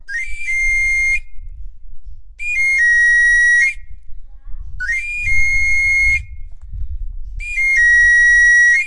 Knife sharpener 1
In Mexico this sound is characteristic from the knife sharpeners working on the street.
labour, working, whistle, whistling